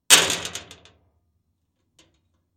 throwing pebbles onto metal08
Contact mic on a large metal storage box. Dropping handfuls of pebbles onto the box.
clack, clacking, contact-mic, gravel, impact, metal, metallic, pebble, pebbles, percussion, percussive, piezo, rocks, rubble, stone, stones, tap, tapping